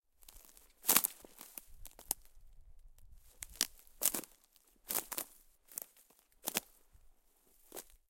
pine-forest--ww2-soldier--jumps--twigs
Soldier in World War II gear moving (mainly jumping) in a Finnish pine forest. Summer.
field-recording, forest, soldier, branches, metal, grass, rustle, foley